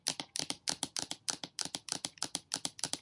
LECOINTRE Chloe son3
This sound represents the sound of nails on a table. This sound reveals impatience or annoyance.
nails, fingernail, impatience